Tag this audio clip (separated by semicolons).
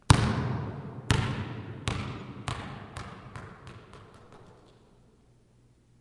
ball; basket; basket-ball; field-recording; hits; sports